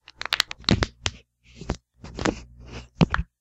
The sounds of bones cracking, and/or breaking.